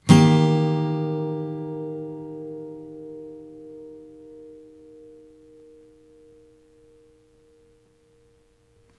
yamah Cm7
Yamaha acoustic guitar strummed with metal pick into B1.
chord, c, amaha, guitar, minor, acoustic, 7th